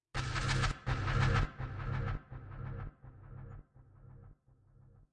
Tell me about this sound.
washer machine with efffect
I put some reeverb effect on washer machine
machine, reeverb, washer